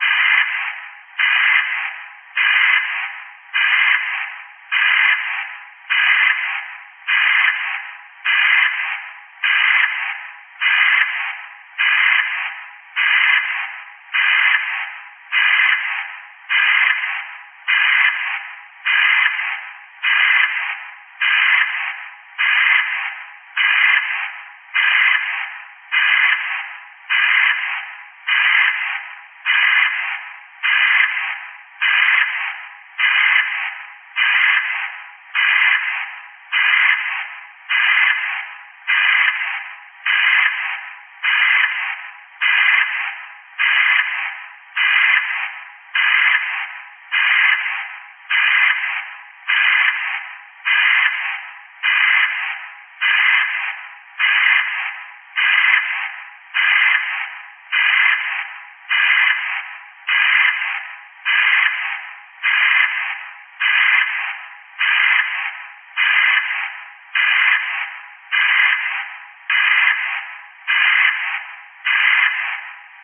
A foley of someone breathing through a respirator or gas mask at 50 breaths per minute.